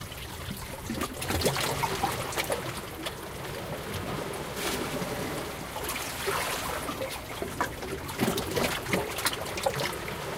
Beach-19 (under rocks)
Taken with Zoom H2N, the beaches of Cyprus
beach, coast, ocean, sea, seaside, shore, water, wave, waves